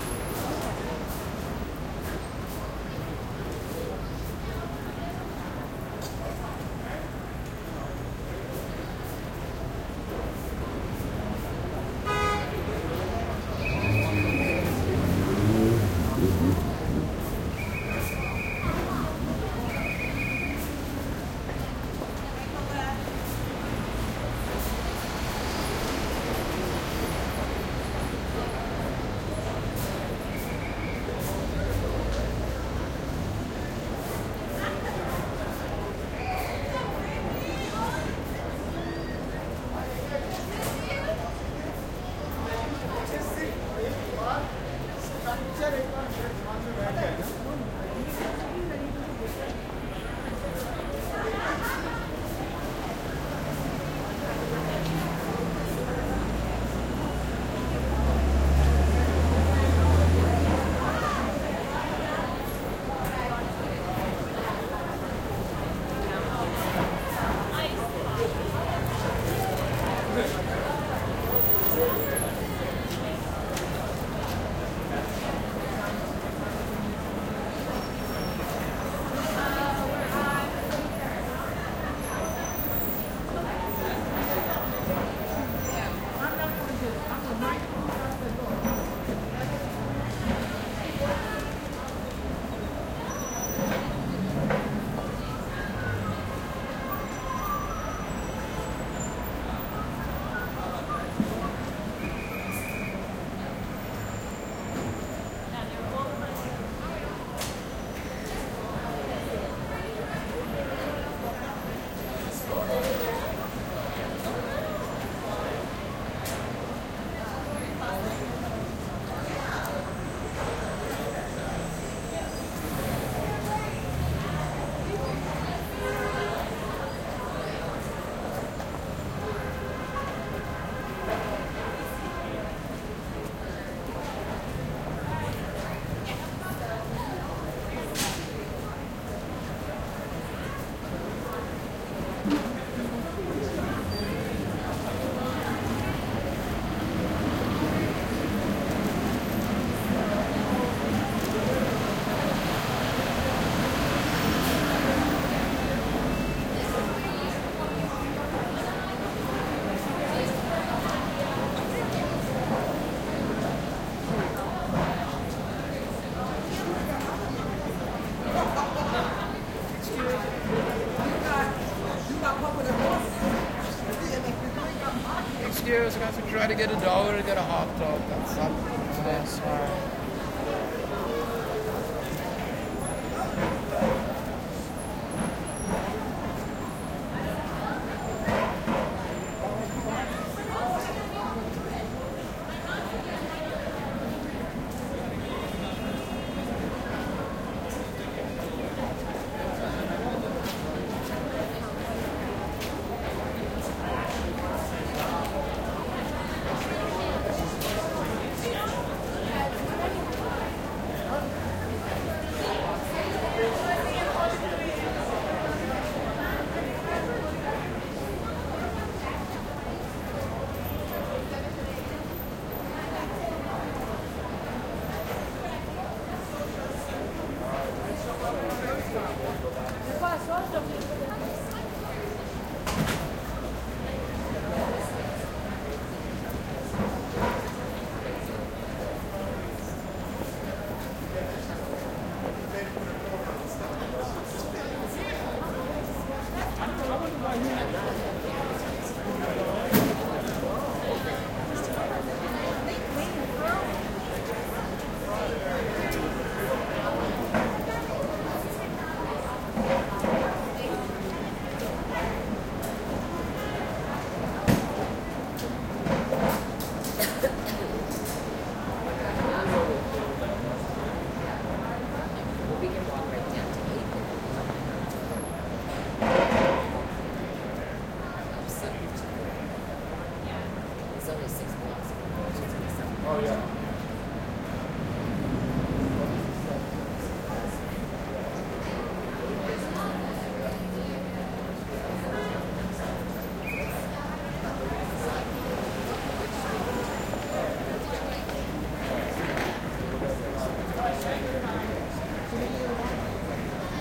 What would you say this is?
This recording was made 12 years ago, in 2008, so I may be wrong, but I believe it was recorded in Times Square.